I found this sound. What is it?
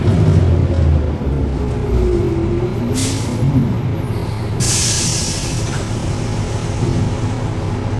Small recording of a transit bus engine (USA)
bus, engine